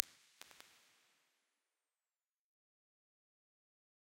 IR ST Mountain Range 03
A digitally modelled impulse response of a location. I use these impulse responses for sound implementation in games, but some of these work great on musical sources as well.